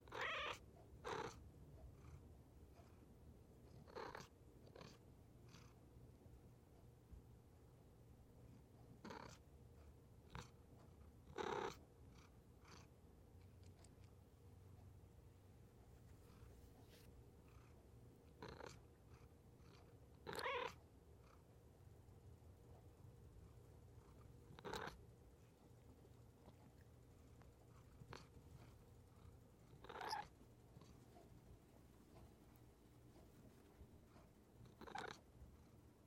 MISC Int Cat Meowing 002
Another recording of my cat trying to get my attention. I couldn't get rid of all the room ambience, but I got the mic as close as I could without Max eating it. LOL.
Recorded with: Sanken CS-1e, Fostex FR2Le
animal, cat, creature, gremlin, meow, pet, purr